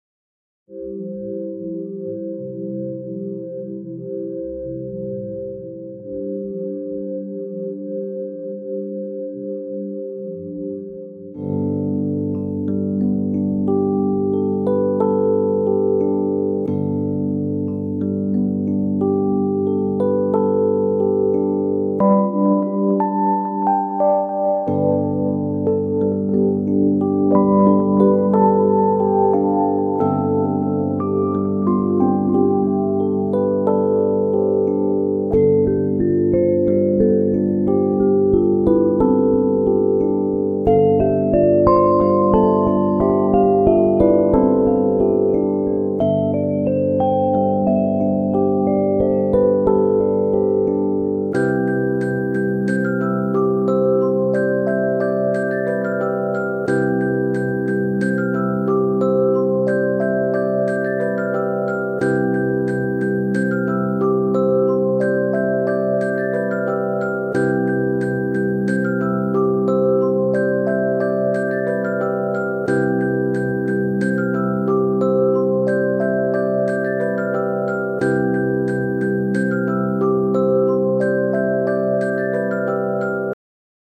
Per Adele
music, nephew, soft